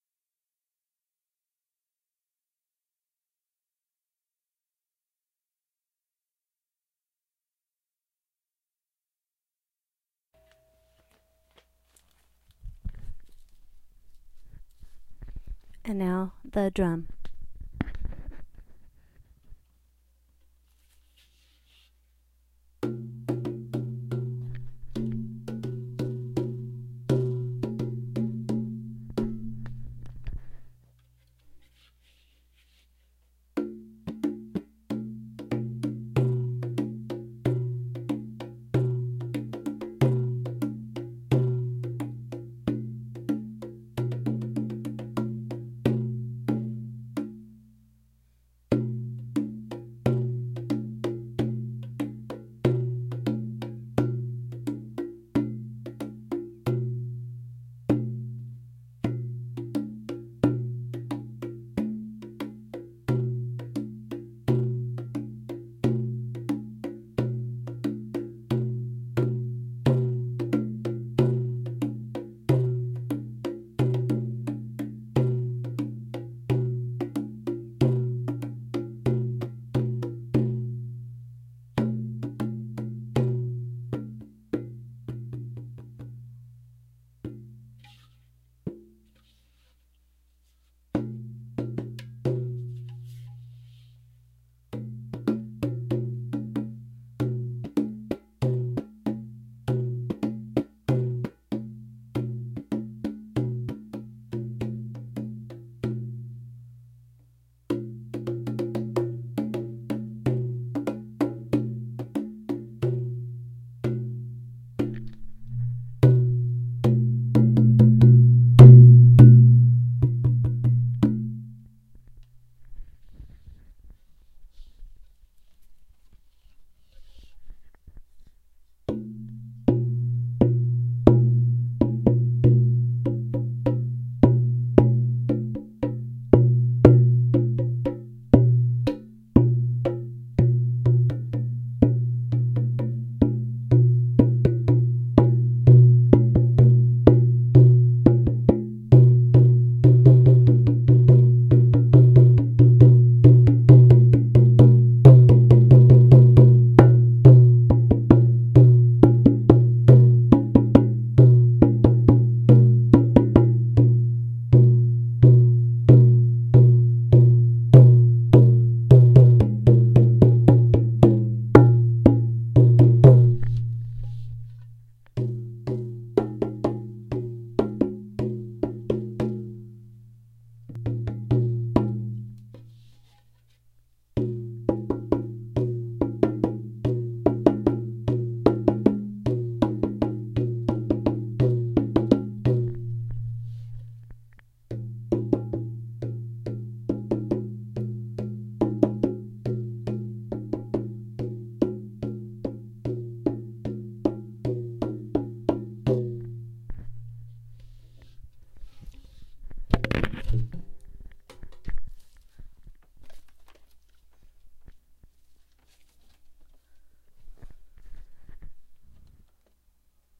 I am recording this drum's resonance mainly for an ebay post....not sure if i can embed on ebay.
I like the drum but I have too many drums.
1 drum appears to be a lone tabla drum longing for a partner and a drummer who knows how to play it the :"right way"... here I appear to be falling into mostly simplistic rhythms that are intermittant....peace....
drum, inexperienced, random, resonance, tabla, vibration